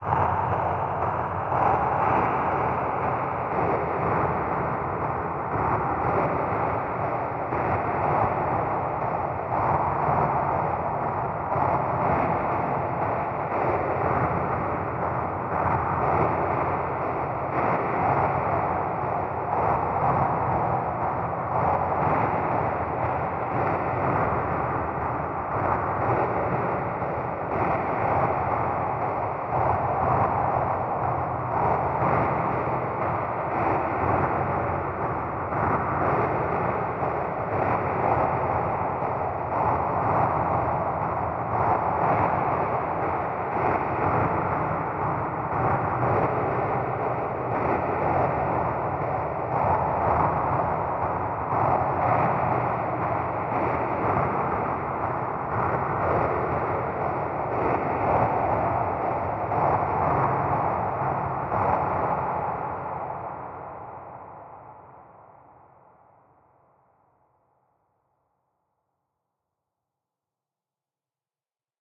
Alien Planet 3
abstract, alien, future, fx, lo-fi, organic, planet, sci-fi, sfx, sound-design, sounddesign, soundeffect, strange